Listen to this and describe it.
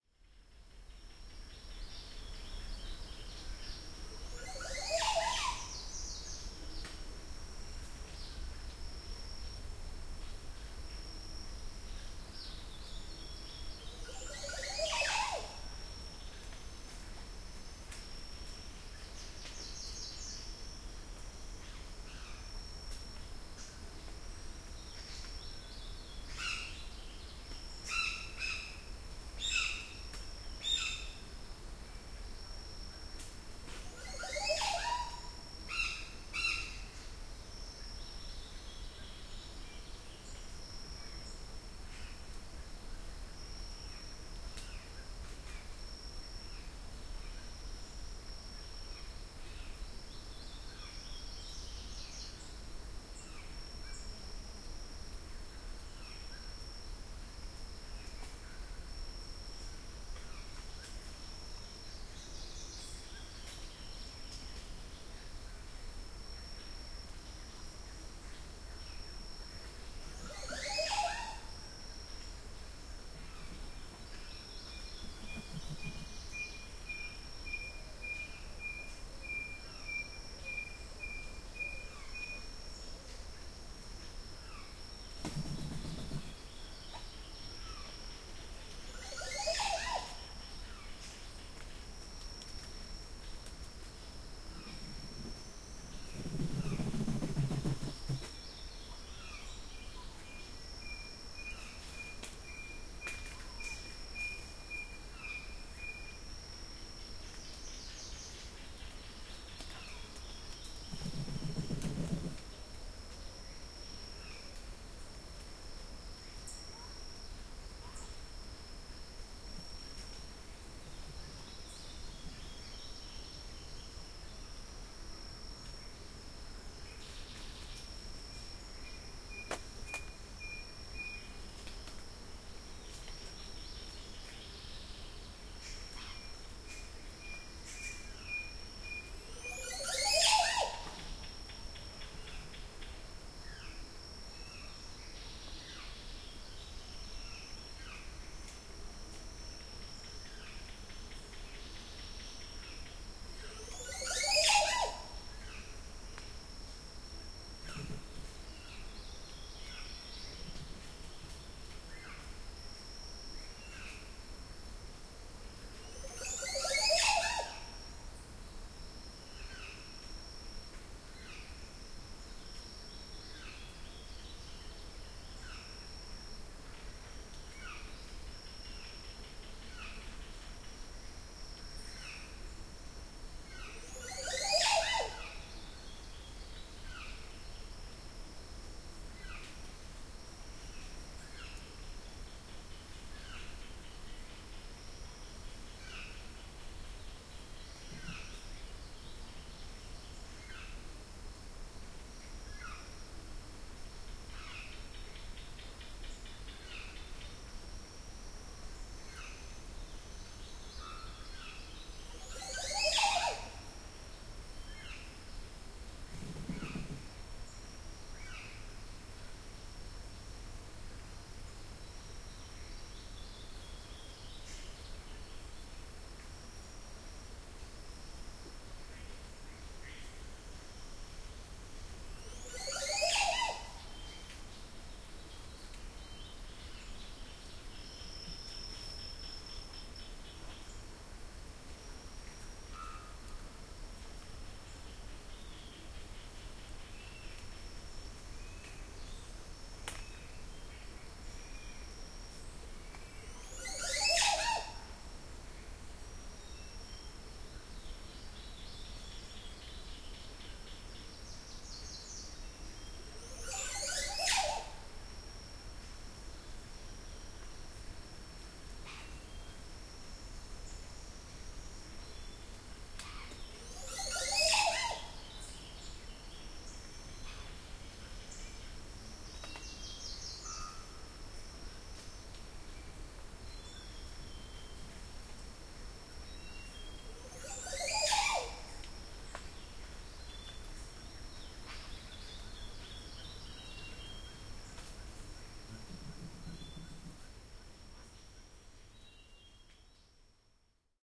such a strange sounding bird
A large bird in a tree making such a funny and strange call. Sometimes he flies to other trees. According to klankbeeld this bird is a Montezuma oropendola. Thanks to you for figuring that out for me, klankbeeld!
Recorded with a pair of Sound Professional binaural mics (MS-TFB-2) inside a Rode Blimp into a modified Marantz PMD661.